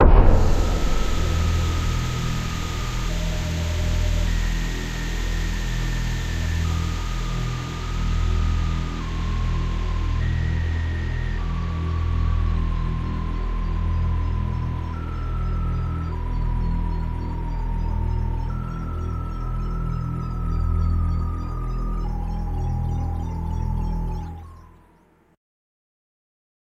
In The Maze II
Cool sound created on an old Korg NX5R sound module.